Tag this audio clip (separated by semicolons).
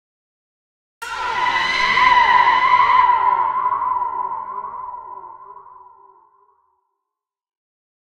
Alien; banshee; Creepy; Ghost; halloween; horror; Monster; Scary; Sci-Fi; Scream; Spirit; Spooky; Strange